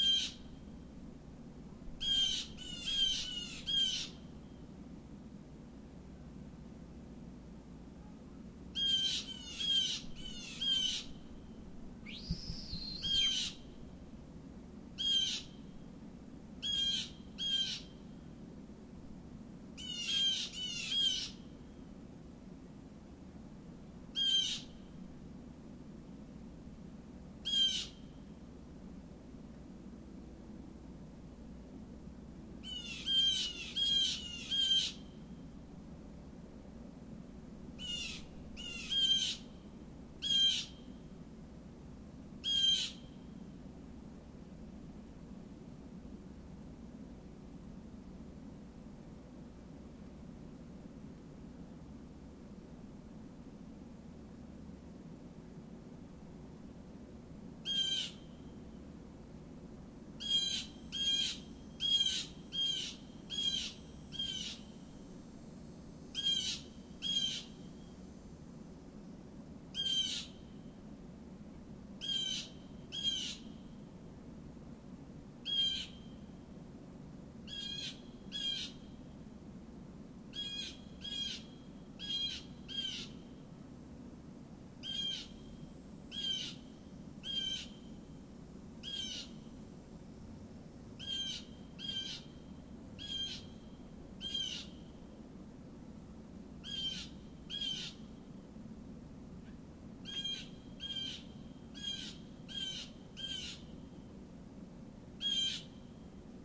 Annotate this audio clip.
The mystery bird calls me as I record with laptop and USB microphone.
bird, patio, mystery, avian, call, outside